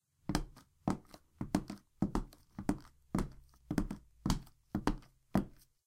Womens shoes 1
Slowly walking female shoes on a hard surface. Might be useful to split up for foley sounds or animation.
clean, female, footstep, shoe, women